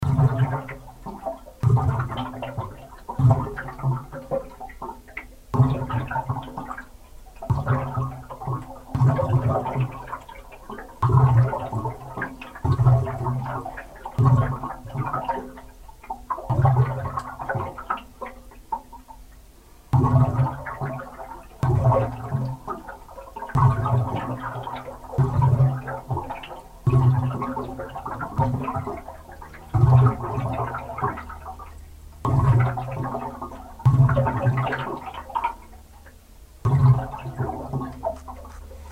Boyler air water
This sounds come from a boyler water flow down.
air-bubble, Boyler, water